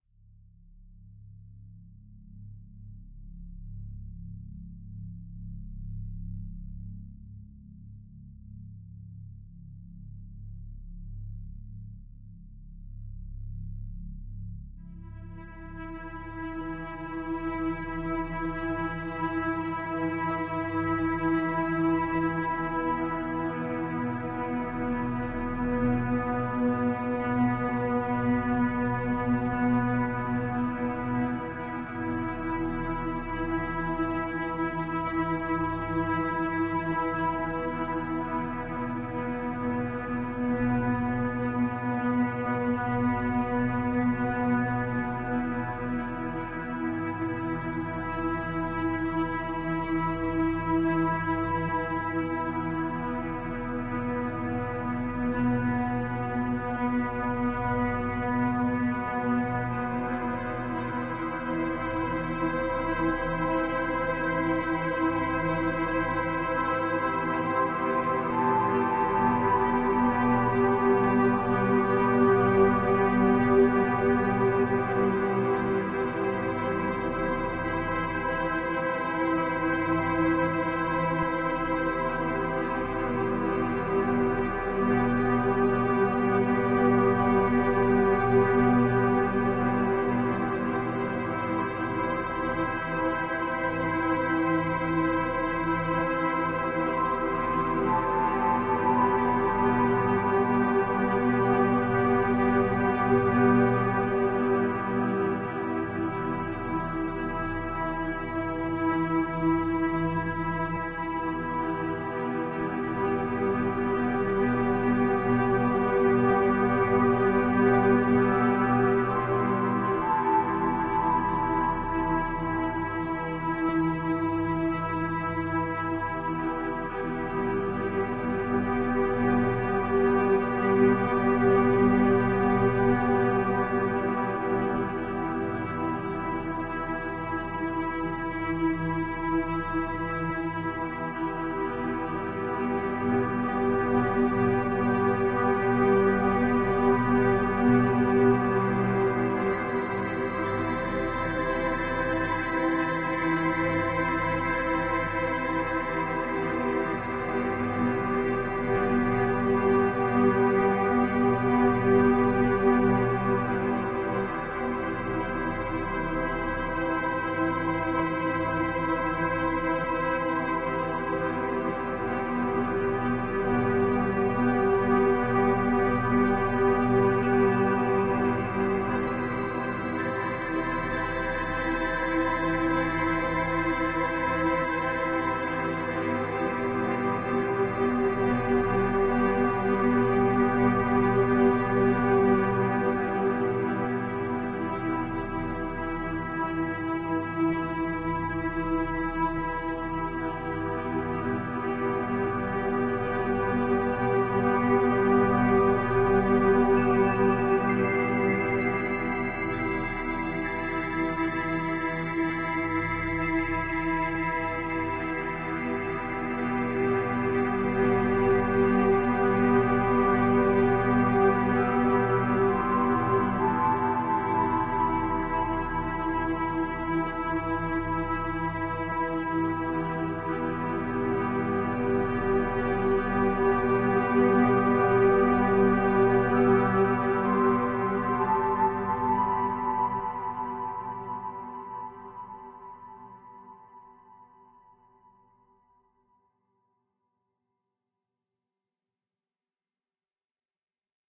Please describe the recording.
Remnants Of The Past
Just another music piece I feel would fit well during a dream sequence or a memory in a movie scene.
ambiance, ambience, ambient, atmosphere, background, background-sound, Dreamscape, field-recording, general-noise, Horror, Movie, peaceful, screen, soundscape, space, Suspense